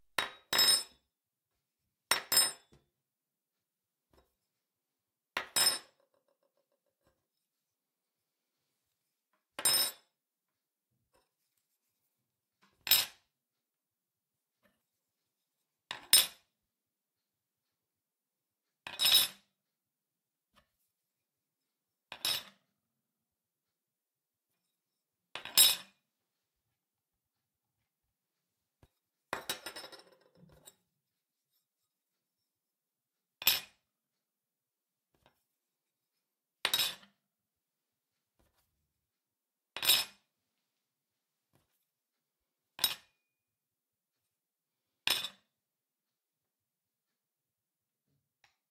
Fork Onto Table
A fork being placed onto a wooden table. Recorded using a Neumann KM185 and a Sound Devices 552.
cutlery, fork, hits, knife, metal, spoon, table, wooden